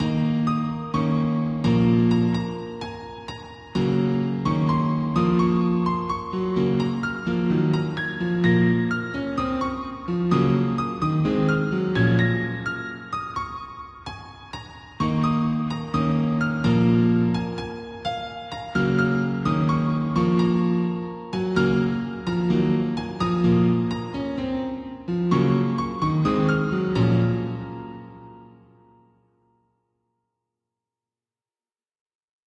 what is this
Back to the journey
cool, melody, music, Piano, sample